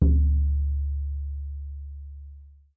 African Drum4
Single shot on african hand drum.
percussion, drum